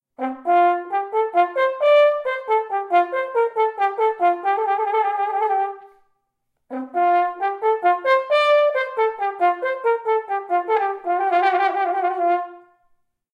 horncall bozza enforet2 Fmajor
A jaunty melody in F-major in a hunting horn style, from the second half of Eugene Bozza's solo horn piece "En Forêt." Recorded with a Zoom h4n placed about a metre behind the bell.